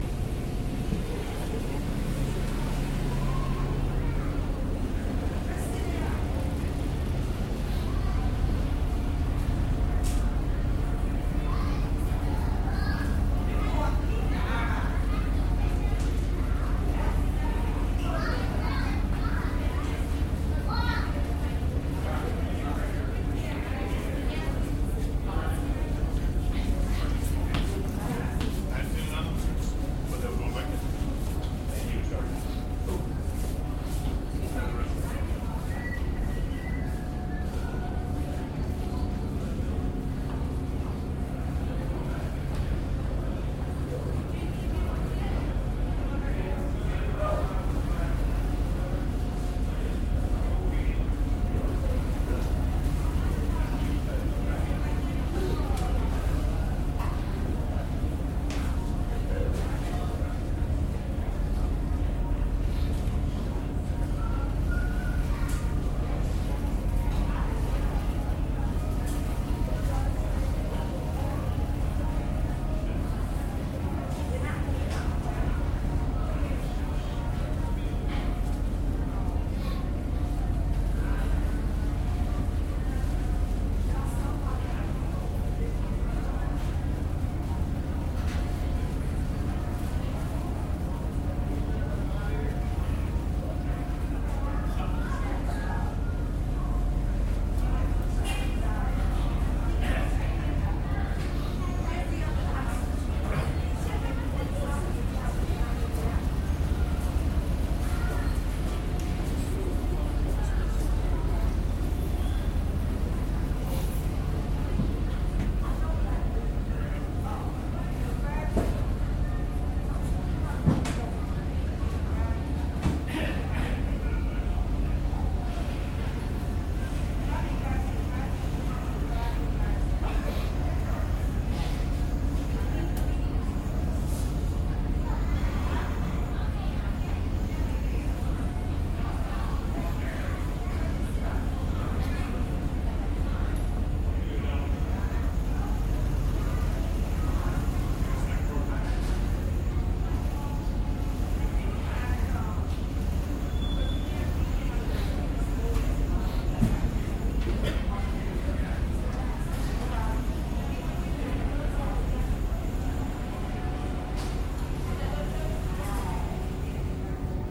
Inside the Staten Island ferry, New York City